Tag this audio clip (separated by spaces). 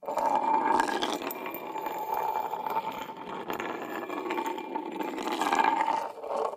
drag glassy grind sound